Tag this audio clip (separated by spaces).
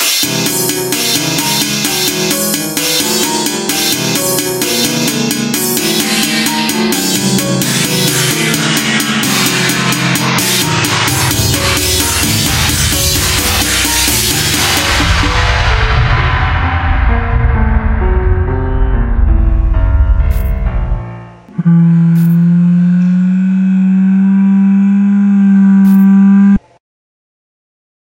Please-stop ambience music creepy Chaos Make-It-Stop